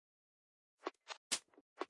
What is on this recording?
HAT Seq 126
SPS1 elektron sequence
sequence, SPS1